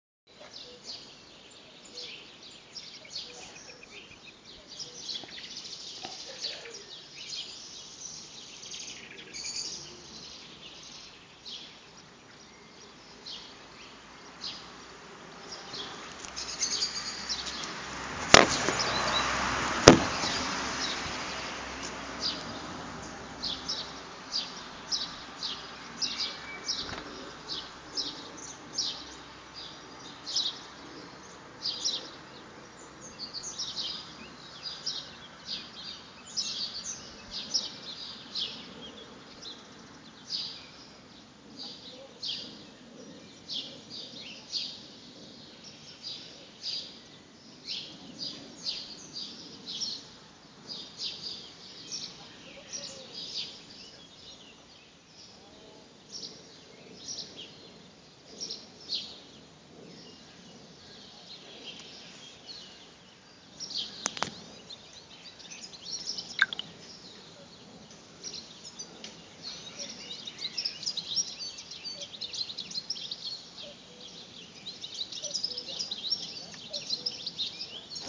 Birds twittering recorded in a wildlife park.
bird, nature, twittering